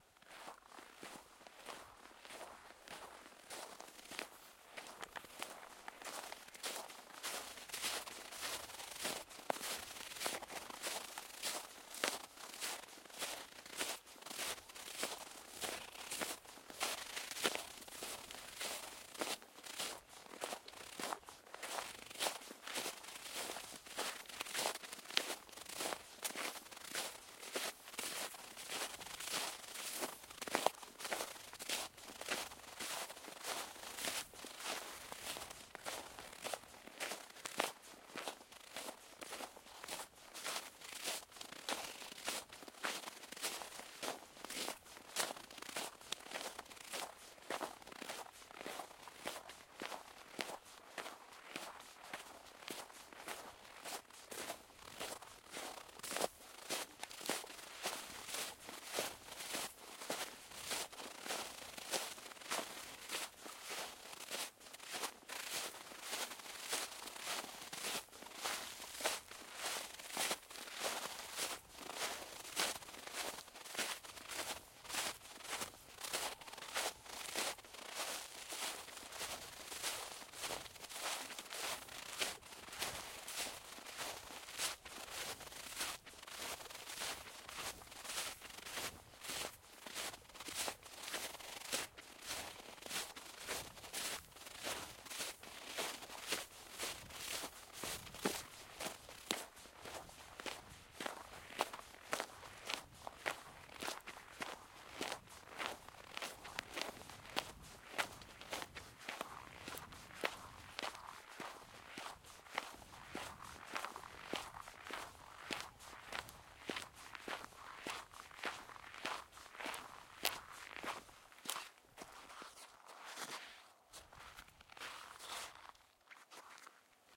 Steps in the snow at night in the forest
When I went for a winter walk in the woods today, I noticed that the path was completely snowed in, unlike last week. Since I immediately took the opportunity and recorded a few authentic snow steps...